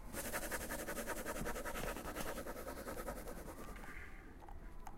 SonicSnap SASP SaraMariaAlberta
Field recordings from Santa Anna school (Barcelona) and its surroundings, made by the students of 5th and 6th grade.
sonicsnaps, 6th-grade, santa-anna, spain, cityrings